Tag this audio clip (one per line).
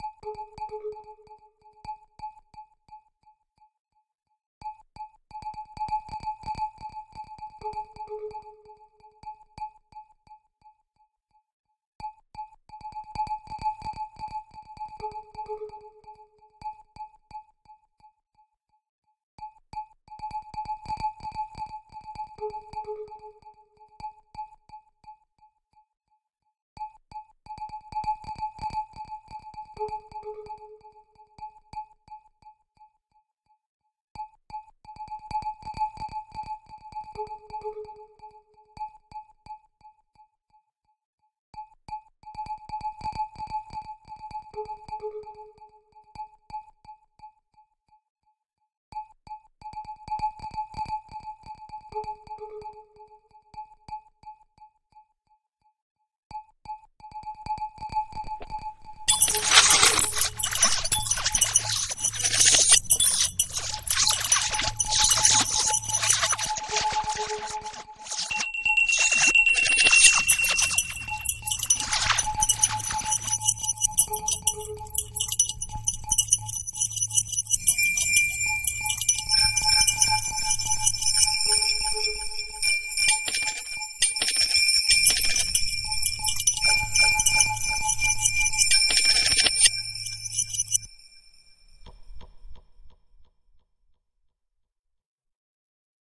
growl
delay
granular
synth
bell
electronic
experimental
glitch
processed
wobble
electro